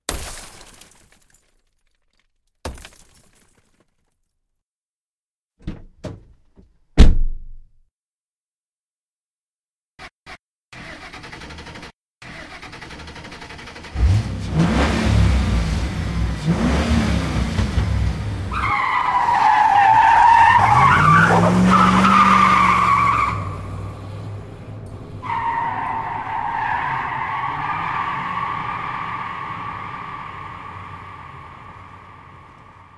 Multiple sounds combined to created the actions of a car being stolen off the street. Car window broken, door opened and closed, attempt to start engine, engine starting and doing a burnout as it speeds away.
car-jacking, engine-start, theft, tires-squeal